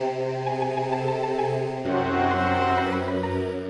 Dark/mystery loop made in FL Studio.
2019.